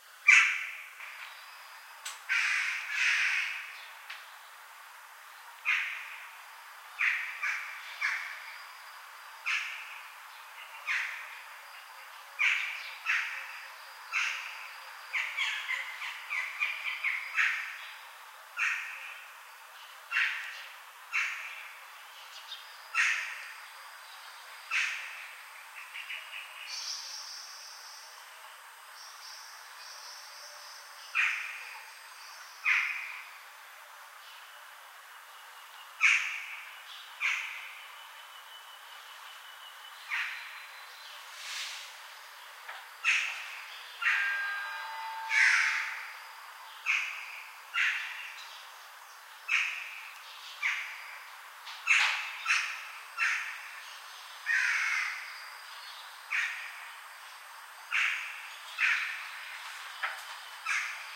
a jackdaw singing in the city. Traffic rumble removed.
city, jackdaw, field-recording, birds